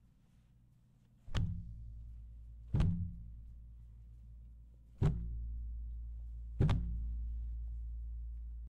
an arm loosely falling near mic to simulate a fall